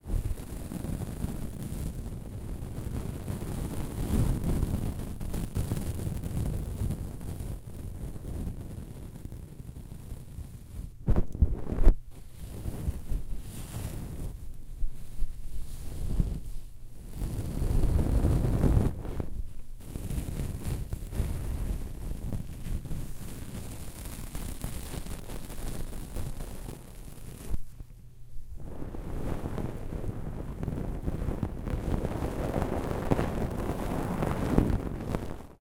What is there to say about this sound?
Insulation tear
Miked at <1-2" distance.
Fibreglass insulation layers torn apart in front of mic; insulation gently dragged against the grill of the mic.
distortion, fibres, insulation, noise